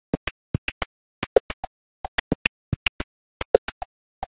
Light electronic percussion loop.